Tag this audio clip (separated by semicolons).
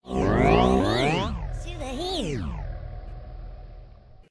vocal; effects; female; fx; girl; speech; voice